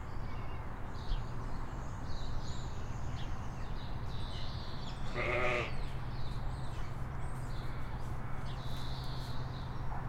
Adult sheep, one baa. Recorded on a Marantz PMD 661, 22 August 2021, with a Rode NT4.
field, Sheep